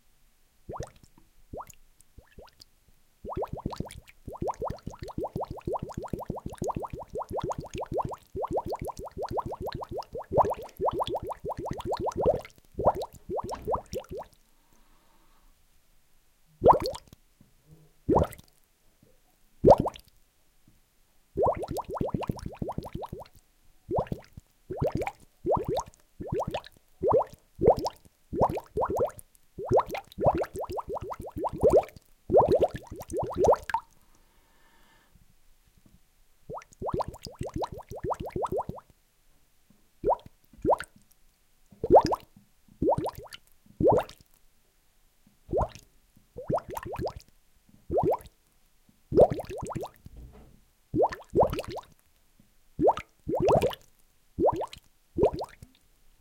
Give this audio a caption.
Recorded with Rode VideomicNTG. Raw so you can edit as you please. Me using a large tube to create bubbles in a sink.